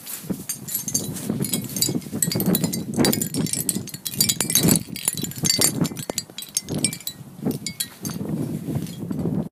Wind chimes
chimes, night, wind, wind-chimes